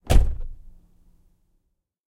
car door slam 35 A
slamming car door A
effect, close, car, door, slam, foley, sound-effect